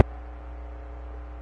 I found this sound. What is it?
ir wire sub clean
Some processed to stereo artificially. Magnetic wire underwater sources.